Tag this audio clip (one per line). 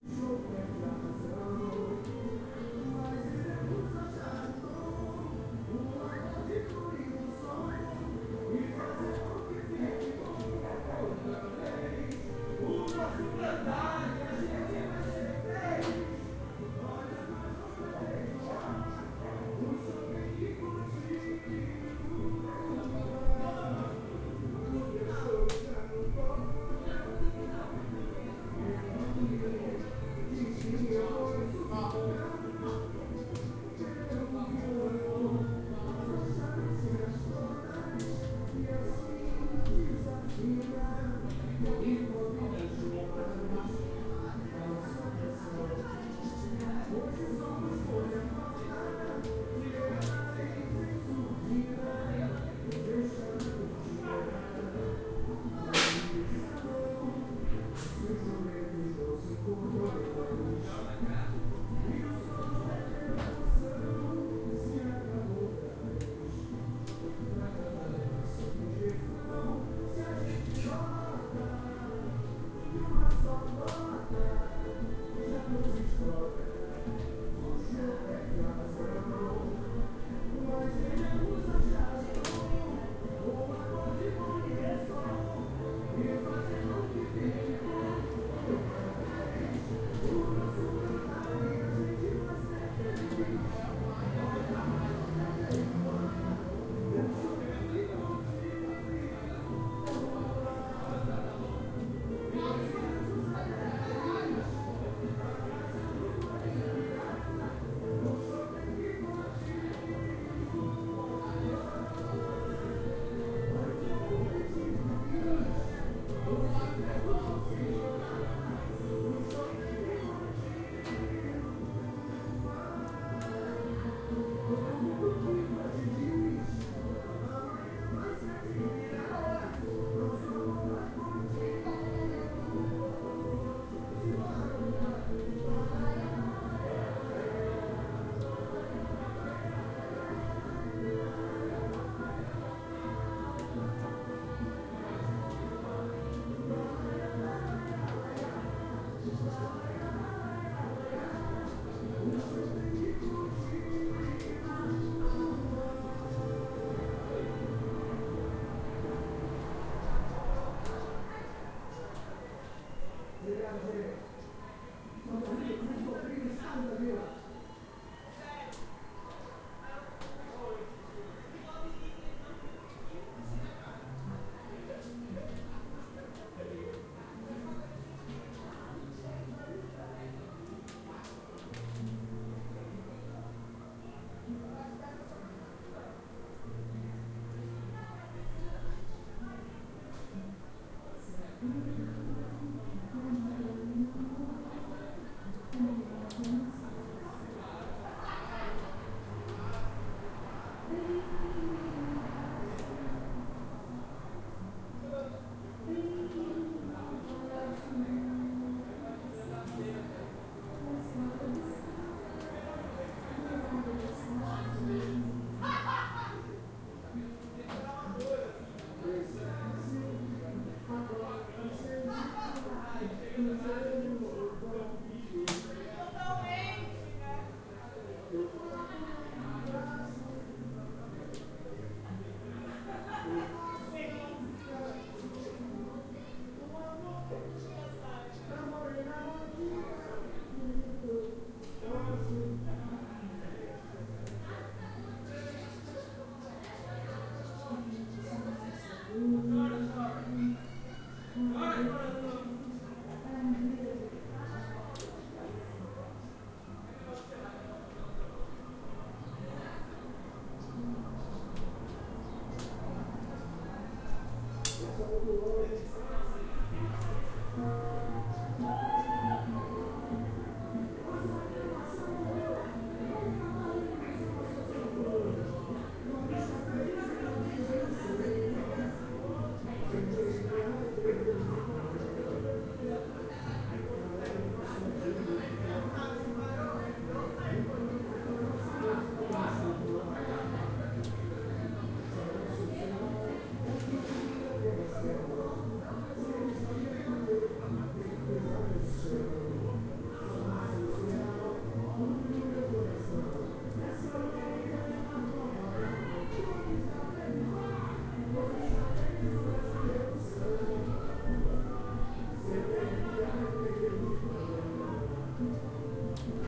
brazilian
music
party
portuguese
samba
singing
voices